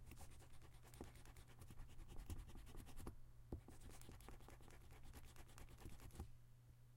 PENCIL ERASING 1-2
Eraser, Paper